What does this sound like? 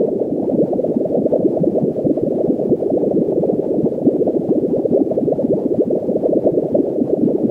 This is not a recording of bubbles -- it is a synthetic loop that only sounds something like bubbles. Created in cool edit pro.